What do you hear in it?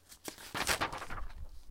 Page from a book being turned
book, page, paper, turn